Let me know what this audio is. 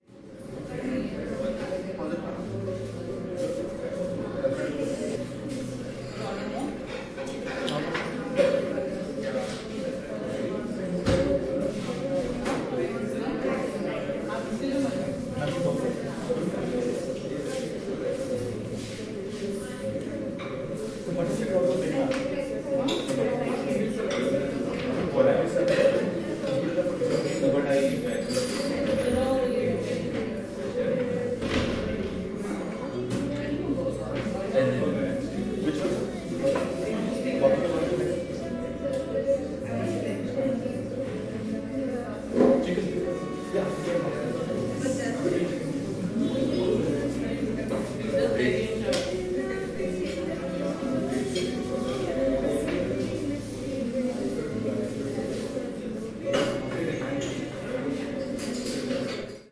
ambient sounds inside a coffee house in Hyderabad,India. guest ordering food. lot of chatter.. low background music